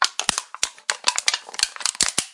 56 recordings of various manipulations of an (empty) can of coke on a wooden floor. Recorded with a 5th-gen iPod touch. Edited with Audacity
aluminium, aluminum, can, coke, coke-can, cola, crunch, crush, hit, metal, move, place, roll, rolling, steel, tick, tin, tin-can